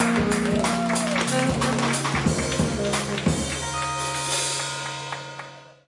An Eracist Drum Kit Live Loop - Nova Sound
An, Bass, Beat, Clap, Drum, Erace, Eracist, FX, Groove, Hat, Hate, Hi, Hip, Hop, Kick, Kit, Live, Loop, Percussion, Propellerheads, Reason, Rhythm, Snare, Sound, The